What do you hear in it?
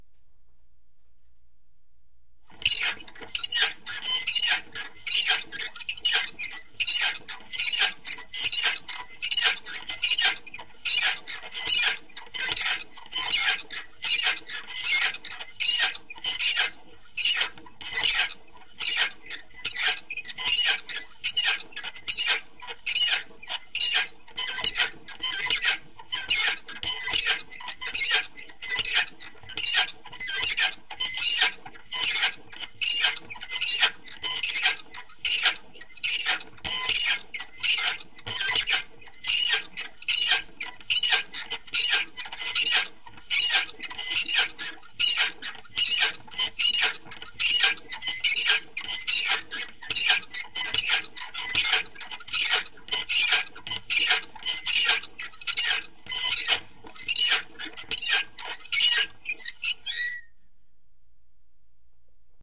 Meat Grinder08M
I recorded these sounds made with a toy meat grinder to simulate a windmill sound in an experimental film I worked on called Thin Ice.Here is some fast squeaking.
metal, recorded, toy